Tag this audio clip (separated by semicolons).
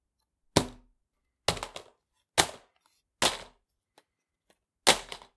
dropped; DVD; floor; ground; Shell